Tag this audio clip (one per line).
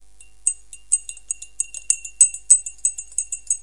spoon
melody
bell
phone
non-disturbing
tea
mobile
reverb
alarm